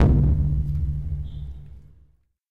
dumpster kick 01
kicking a dumpster
percussion, field-recording